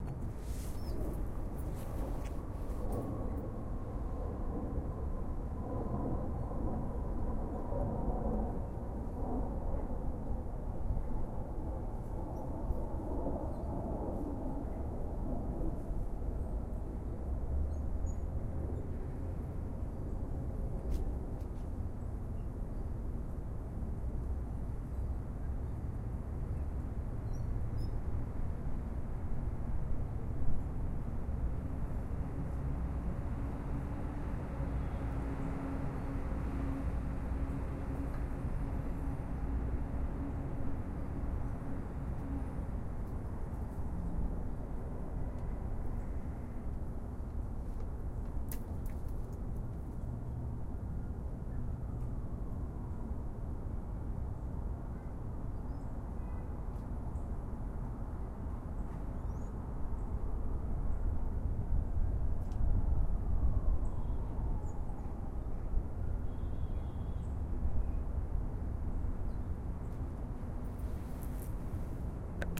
brooklyn ambient
made this recording on my deck in ft greene brooklyn in feb 2009
brooklyn, field-recording